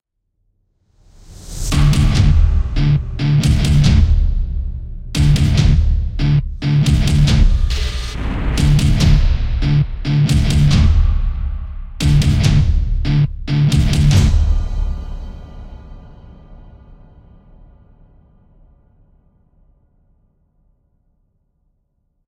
A somewhat heavy/contemporary short trailer experiment using the Kontakt ensembles - damage, noiseash, and rise & hit.
distorted, game, heavy, contemporary, cinematic, action, movie, dark, trailer